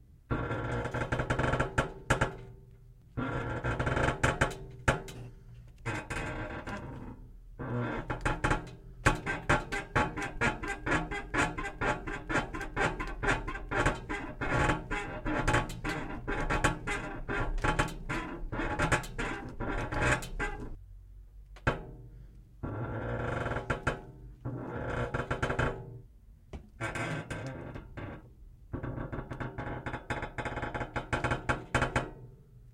Metal bed spring recorded from below the bed with Neumann RSM191, decoded.
Neumann, RSM191, Spring, Metal, Bed